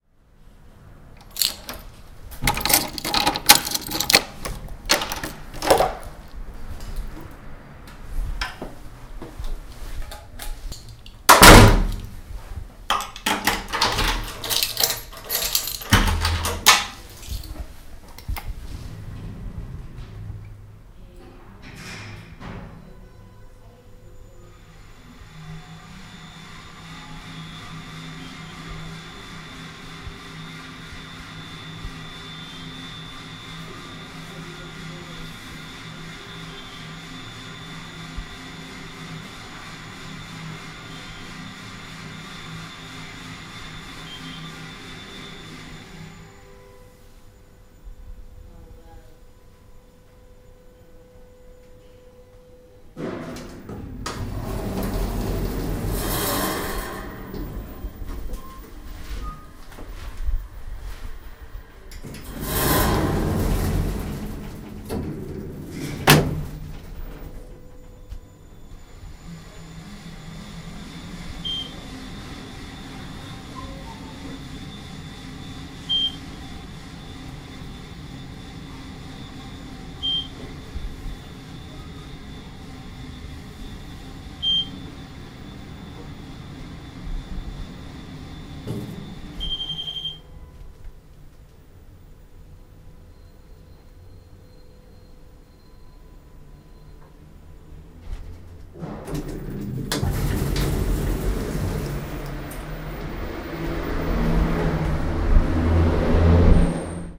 SEC LLAVES PUERTA ASCENSOR LOBBY.L
FIELD, w, Recorded, H1